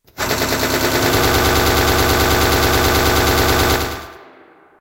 Minigun of a Battle Mech is shot
cannon, fire, gatling, gun, helicopter, machinegun, mech, minigun, rapid, robot, shot, war, weapon